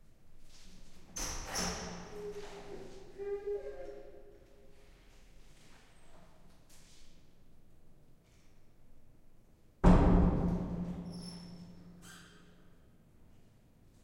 Queneau ouverture ferme porte reson 01
ouverture et fermeture d'une porte dans un hall